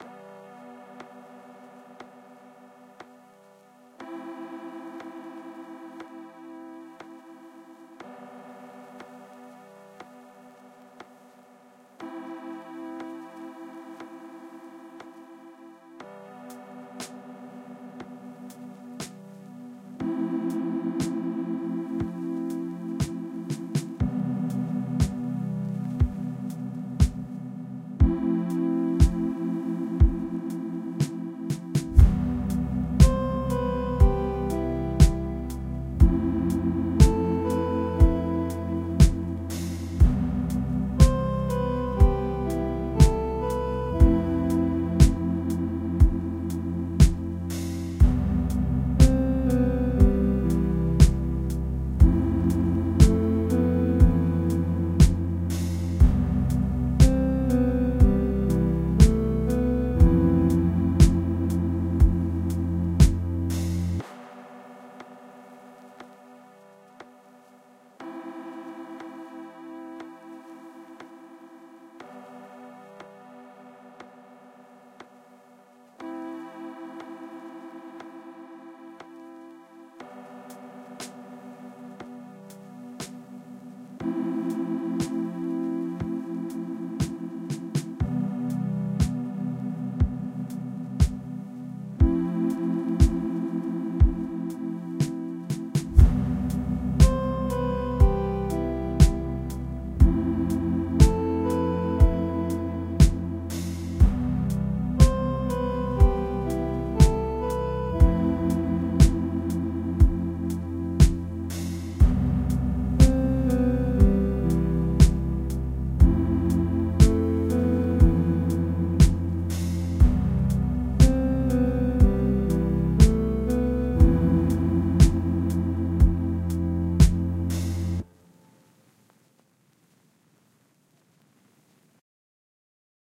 Fuzzy Lofi Synth Song
bg, lo-fi, seth, percussion-loop, relaxing, calm, lofi, loops, groovy, beat, electronic, song, beats, ambient, fuzz, fuzzy, background, loop, synth, music, noise